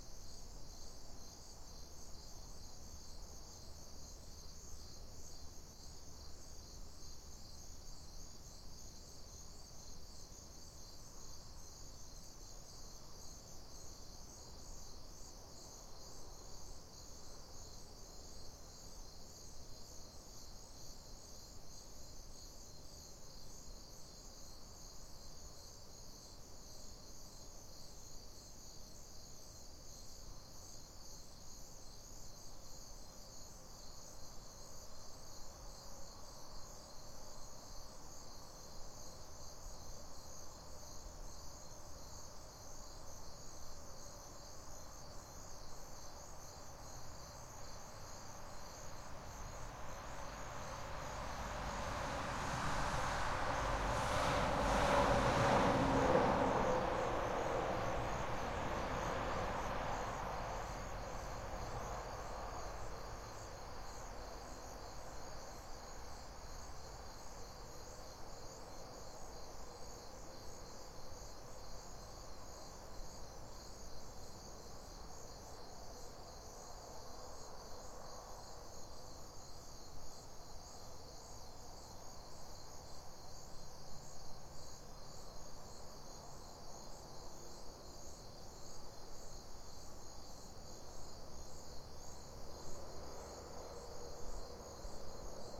Hilden, night, open field crickets, truck drive-by
Hilden. Night, open field crickets, truck drive-by. This sample has been edited to reduce or eliminate all other sounds than what the sample name suggests.
crickets, field-recording, light-traffic, night